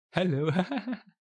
Hello hahaha - soft vocal
a-capella, chuckle, haha, hahaha, hello, human, joke, laugh, male, soft, speech, spoken, vocal, vocal-sample, voice, word